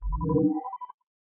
Power Failure
Futuristic Message
power, failure, message, futuristic